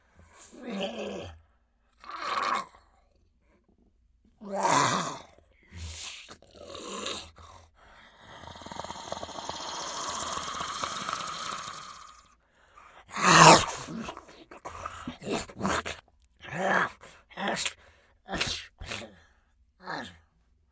Velociraptor Gurgles
velociraptor, dinosaur, gurgle